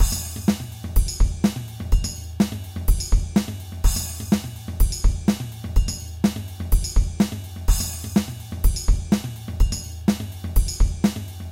TIG New Wave 125 Tijo Loop
From a song in an upcoming release for Noise Collector's net label. I put them together in FL. Hope these are helpful, especialy the drum solo and breaks!
new-wave, acoustic, break, realistic, 125bpm, drums, beat, drumloop, loop, track